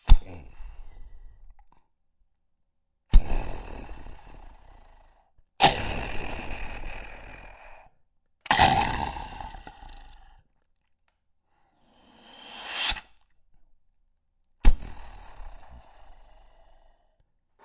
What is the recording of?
Making booming explosion type sounds into the mic with my mouth.
explosion, mouth, boom